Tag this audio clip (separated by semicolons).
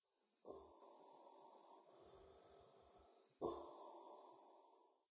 Tarea; Audio; Clase